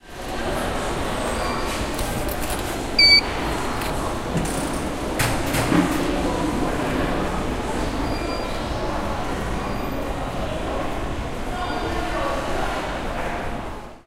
0270 Entrance metro machine Yeoksam
The machine at the entrance of Yeoksam metro station. Beep. People in the background.
20120527